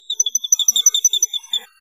Bird-Like-Chair-Squeak-1
Odd high-pitched squeak from my office chair sounds enough like baby birds chirping to get my cats looking around for them.